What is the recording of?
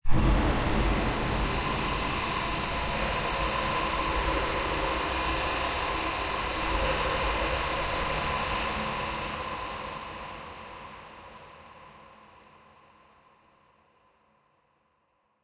Result of a Tone2 Firebird session with several Reverbs.
experimental, dark